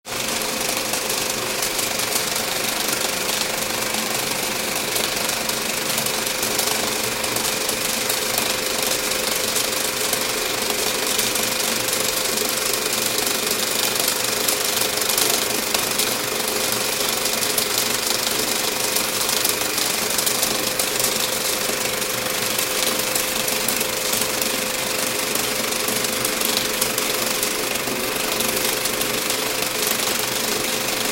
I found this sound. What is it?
Film on old projector
Recorded at the Cineteca in Madrid, Spain. Thank you!
cinema, cinematic, drama, film, movie, projector, technology